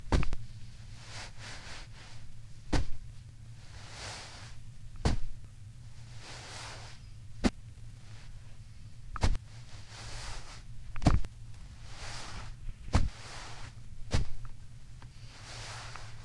A shovel in dirt
Dirt, sound-effect